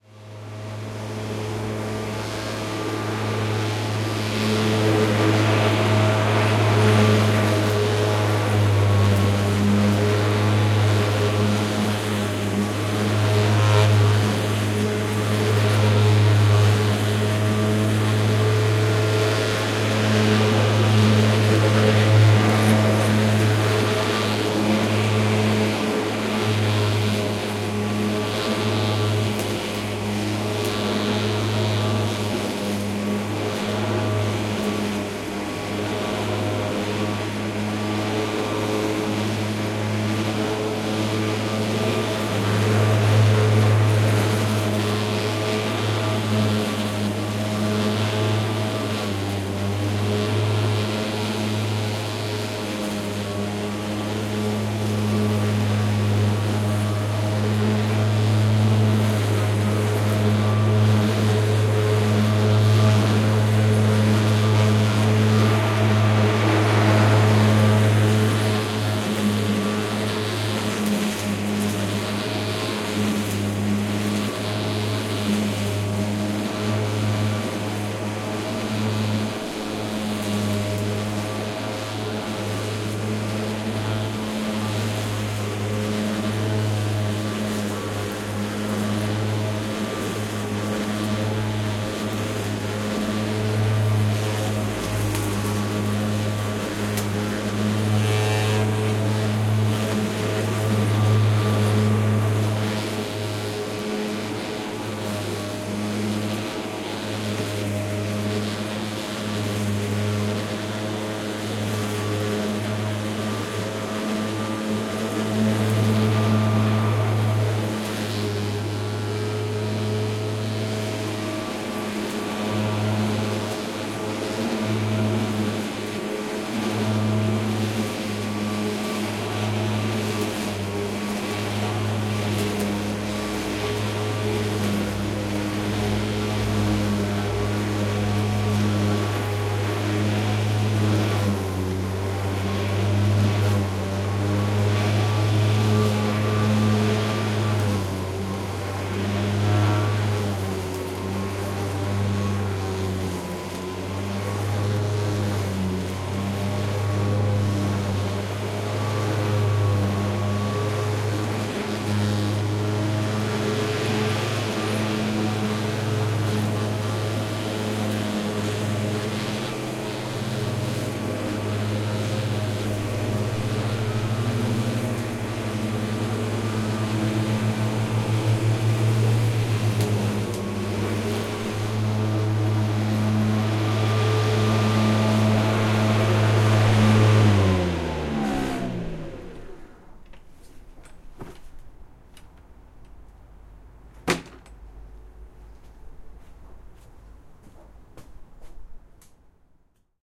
Lawn mower being used on an unkempt, weed filled patch of green that we pretend is a lawn.
Olympus LS-5, internal capsules, no filtering.